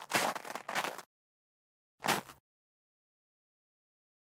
Footstep Snow4
Icy snow in Sweden.
Recorded with Sennheiser MKH 416.